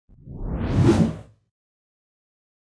wide forward woosh1
01.24.17: Long slowed-down woosh for motion design with a lessened low-end.